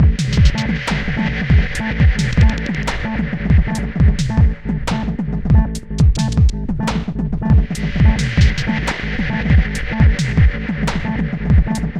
This loop has been created using the program Live Ableton 5 and someof the instruments used for the realization Usb Sonic Boom Box severalsyntesizer several and drums Vapor Synthesizer Octopus Synthesizer WiredSampler Krypt electronic drum sequencer reaktor xt2 Several syntesizer diGarageband 3